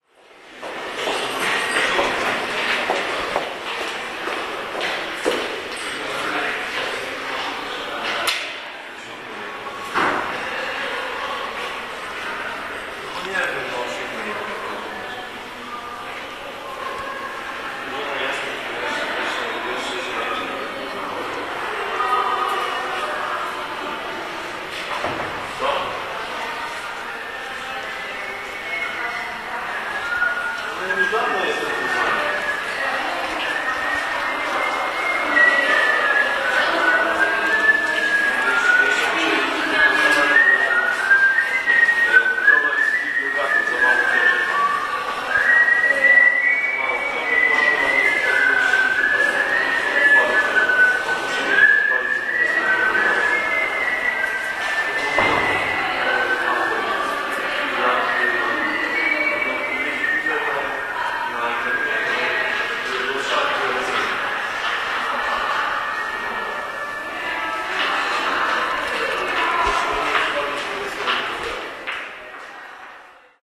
in front of dean's office200910
20.09.2010: about 11.00. I am waiting for Mrs Hania from PhD dean's office. I was trying to set my last doctoral exam. Near of me some guy was talking by his mobile. In the background other guy was whistling the French anthem.
deans-office, echo, faculty-of-history, french-anthem, hall, people, poland, poznan, steps, university, voice, waiting, whistle